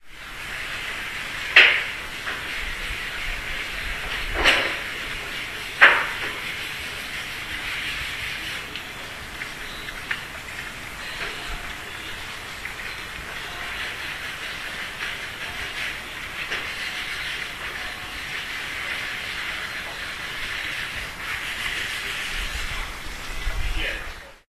some factory sounds210810
21.08.2010: about 12.15. calm sounds from some factory on Spichrzowa street in the center of Poznan. the sound recorded out of the open window.
center, clicks, factory, field-recording, poland, poznan, street, swoosh